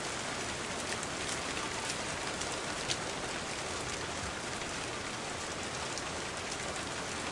Rain Ambience, recorded with Blue Yeti
Storm, Ambience